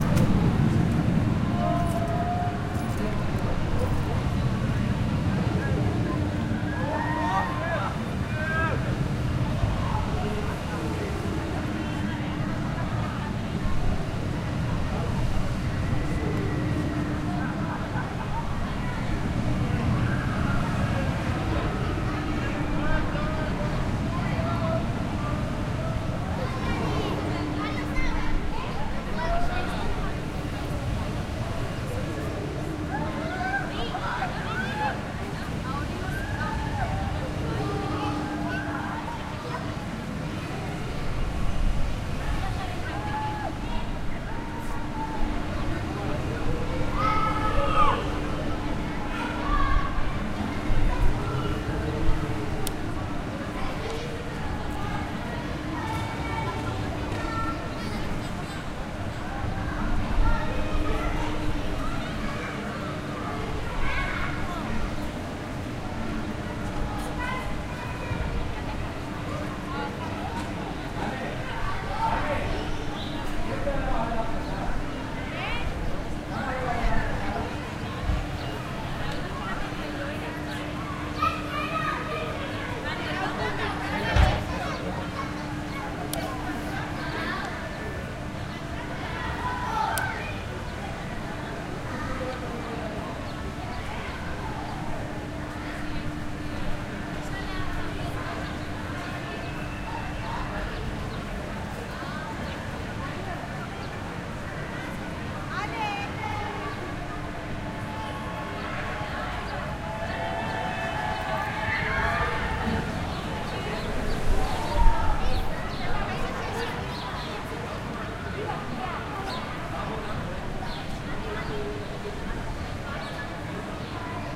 Theme Park Terra Mittica Benidorm
Recorded at around 4pm sat down with a Zoom H5, no processing although cut using Garage band. The ride : THE FURY OF TRITON can be heard in the background
Alicante, Ambiant, Benedorm, Spain, Terra-Mitica, Theme-Park